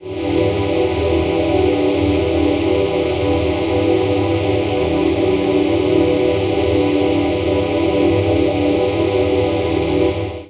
pad gas02
another deep pad in best GAS manner.
ambient, atmo, drone, dub, gas, pad